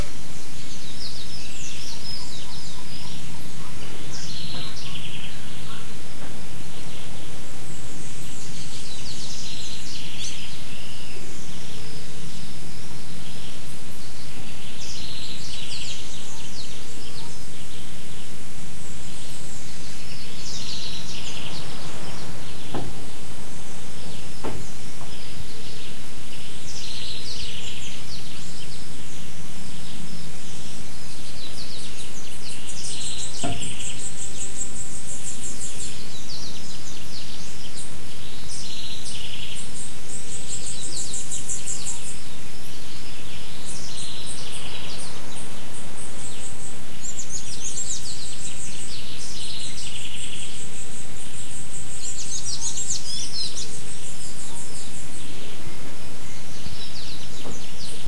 morning-birds short04
Recording taken in November 2011, at a inn in Ilha Grande, Rio de Janeiro, Brazil. Birds singing, recorded from the window of the room where I stayed, using a Zoom H4n portable recorder.
ilha-grande, morning, brazil, birds, bird, field-recording, rio-de-janeiro